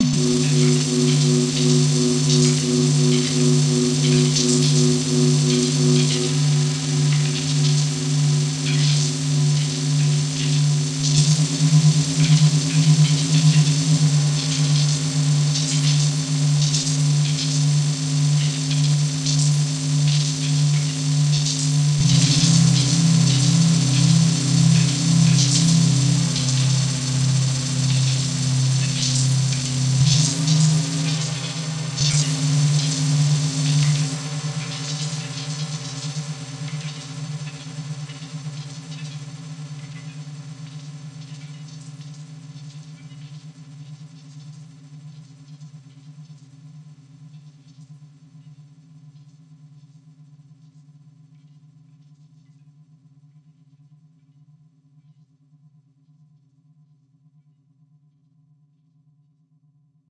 Used the Operator in Ableton to create a sound based on the feeling of stoicism or apathy.
Ableton, Alien, Electronic, Futuristic, Mood, Noise